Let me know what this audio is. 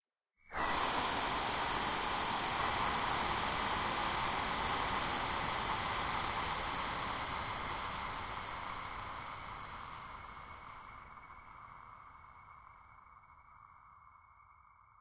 brth sol3 tmty rngsft
Just some examples of processed breaths form pack "whispers, breath, wind". This is a granular timestretched version of the breath_solo3 sample with ringmodulation.
air, breath, granular, noise, processed, ringmodulation, shock, shocked, suspense, tension, wind